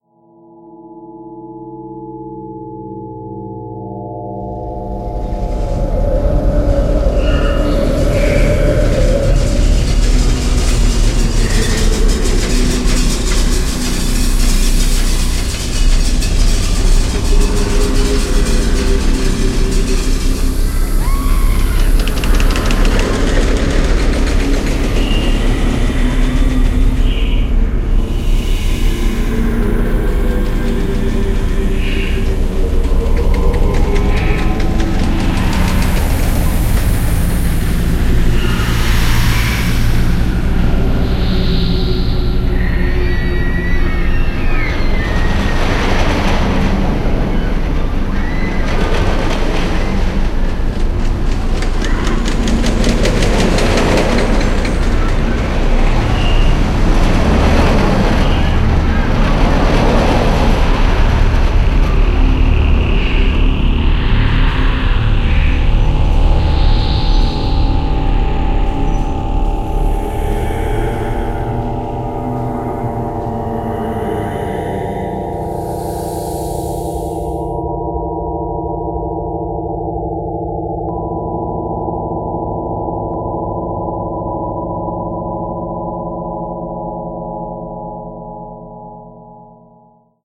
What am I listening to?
Roller Coaster Creepy Horror
various ghostly sounds for a creepy roller coaster ride
haunted, horror, nightmare, scary